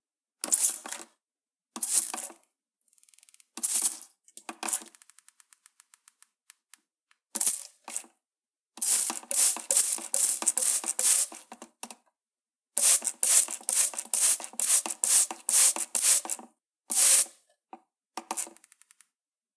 sprayer watering plant
water sprayer sound effect
"Instrument" - cheap sprayer
recorded ... by phone :P (xiaomi A2 litle)
Edited in Audacity.
watering, splash, water, sprayer